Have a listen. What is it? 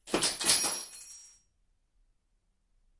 Big crash ambient 1
This sample is a crash of plastic and metal stuffs. Recorded with two condenser rode microphones and mixed with soundtrack pro.
(6 channels surround!)
break, crash, fx, hit, metal, plastic, room, sound, surround